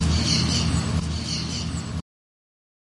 Sonido de ave cantando
noise, ave, bird, pajaro